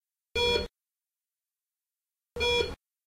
You can hear an electrocardiogram of an elder of the Clínic Hospital from Barcelona.
campus-upf, electrocardiogram, hospital, UPF-CS14